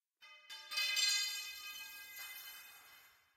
Pipe drop then roll on concrete floor of warehouse.
industrial, pipe, warehouse